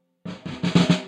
Snaresd, Snares, Mix (13)
Snare roll, completely unprocessed. Recorded with one dynamic mike over the snare, using 5A sticks.
acoustic, drum-roll, roll, snare